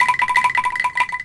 b4-bandung-angklung vib
toy angklung (wooden shaken percussion) from the Selasar Sunaryo artspace in Bandung, Indonesia. tuned to western 12-tone scale. recorded using a Zoom H4 with its internal mic.
sundanese, angklung, percussion, indonesia, bamboo, wooden, hit